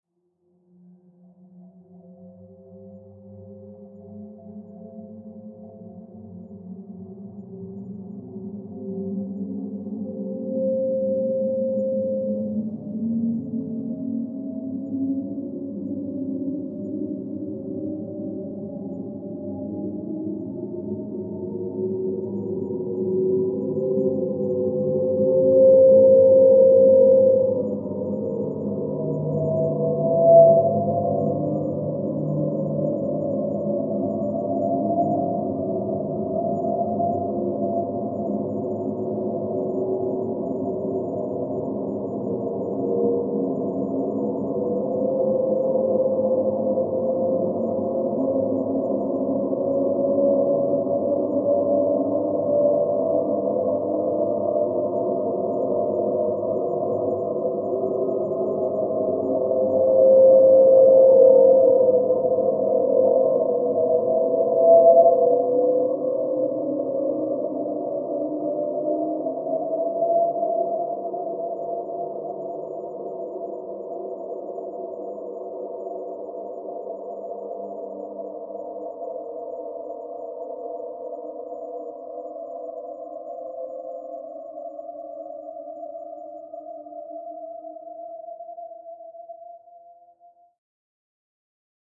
This sample is part of the “Space Sweeps” sample pack. It is a 1:32 minutes long space sweeping sound with frequency going from low till high. Starts quite low but increases smoothly from there. Some resonances appear on it's way up. Created with the Windchimes Reaktor ensemble from the user library on the Native Instruments website. Afterwards pitch transposition & bending were applied, as well as convolution with airport sounds.